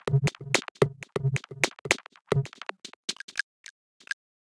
Glitchy Beats and Hits at 110bpm.
Processing:
Black Box HG-2
BX_Console SSL 4000E
Kramer Master Tape
Ozone 9
Gullfoss
110, 110bpm, ambient, bass-drum, Beats, circuit-bend, dark, DnB, drum-and-bass, drum-machine, drums, electro, electronic, glitch, glitchy, hit, lo-fi, percussion, snare-drum